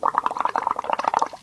Blowing bubbles into a cup of water through a cheap plastic straw.